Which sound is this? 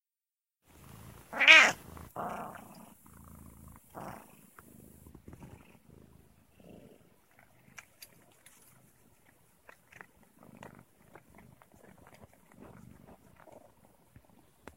cat-waking-ritual
Senior Totrie cat waking up to owner and cleaning herself
cat, cat-cleaning-self, cat-purring, cat-waking-up, purring